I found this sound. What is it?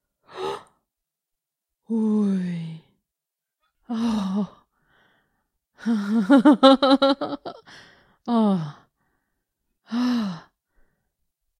AS000834 awe
voice of user AS000834
astonishment, female, wordless, vocal, human, awe, wonderment, amazement, wonder, voice, woman